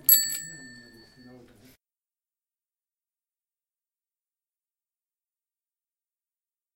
Bicycle Bell from BikeKitchen Augsburg 10
Stand-alone ringing of a bicycle bell from the self-help repair shop BikeKitchen in Augsburg, Germany
bell, bicycle, bike, cycle, pedaling, rider, ring, street, traffic